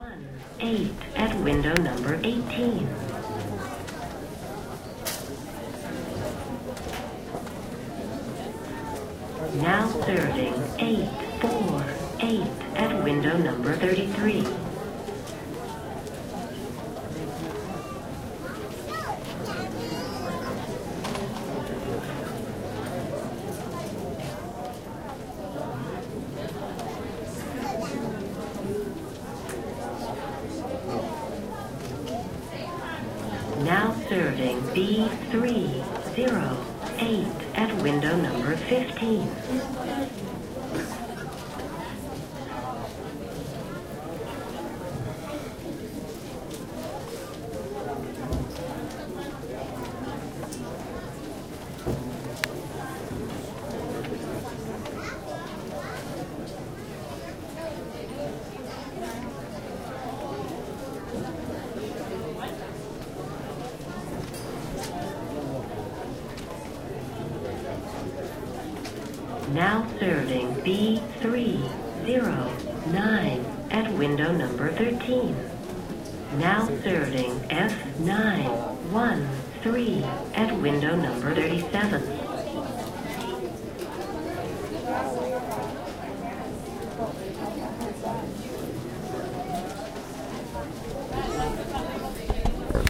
dmv - 'now serving'
Waiting in line at the Department of Motor Vehicles endlessly waiting for my number to be called. Recorded on Zoom H1
NOTE: All of my sounds can be used for whatever purpose you want. It if makes you a millionaire, that's great!
waiting, department, motor, dmv, vehicles, que, new, york, city, line, brooklyn